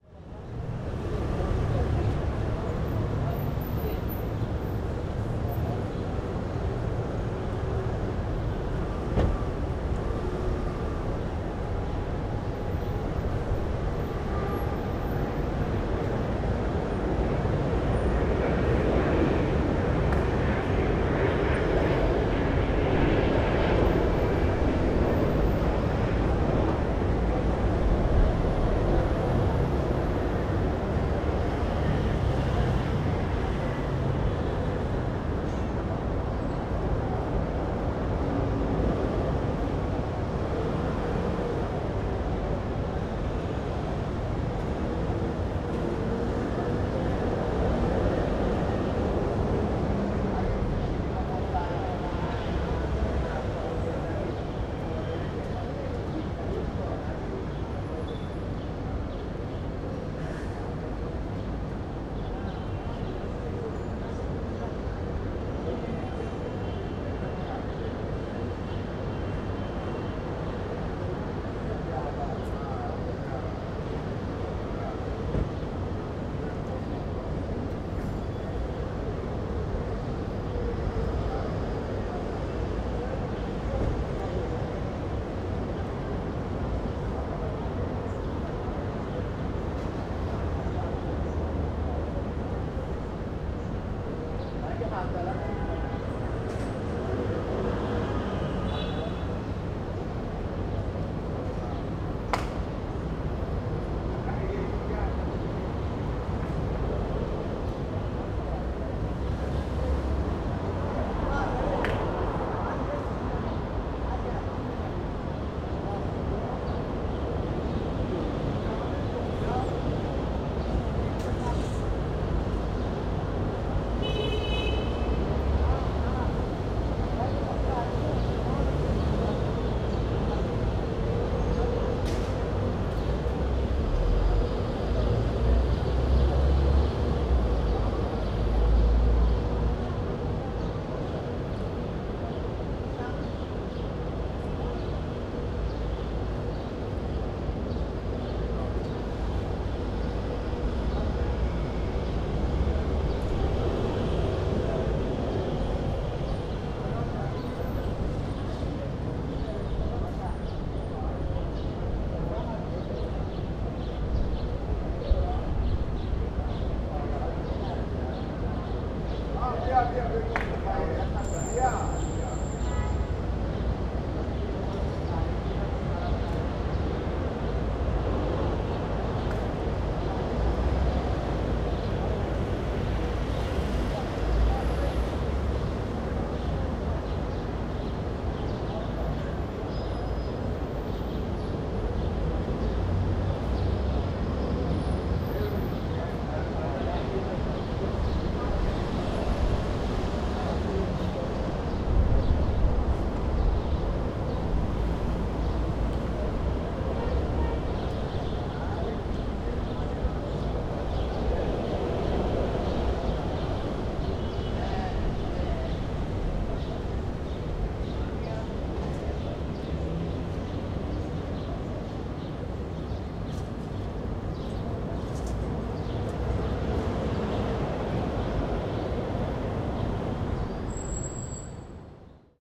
Tel Aviv Israel - Street ambience during summer afternoon
Small street in the city of Tel Aviv, Israel during a summer afternoon. Some cars pass by and people talking and going about their daily life.
Recorded from 4th floor window with a Sennheisser MKE 600 on a Zoom H5.